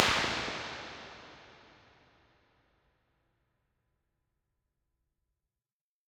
Impulse Response of a Swiss made analog spring reverb. There are 5 of these in this pack, with incremental damper settings.